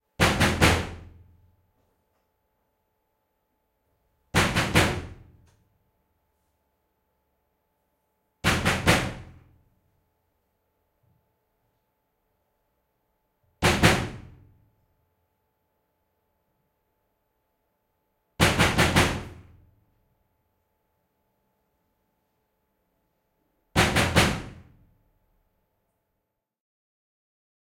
knocking on metalic door
knocking tree times on a metalic door, close up, several takes.
Note that a small electric rumble should be removed
France, 2018
recorded with Schoeps AB ORTF
recorded on Nagra Ares BB
knocking, metalic, door, knock, knocks